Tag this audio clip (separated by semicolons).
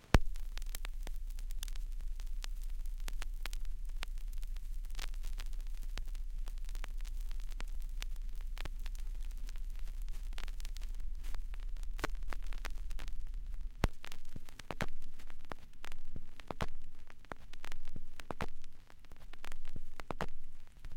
crackle; hiss; noise; pop; record; static; turntable; vinyl; warm; warmth